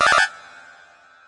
Game Sounds 1
You may use these sounds freely if
you think they're usefull.
(they are very easy to make in nanostudio)
I edited the mixdown afterwards with oceanaudio.
33 sounds (* 2)
2 Packs the same sounds (33 Wavs) but with another Eden Synth
19-02-2014
sound, game, effects, effect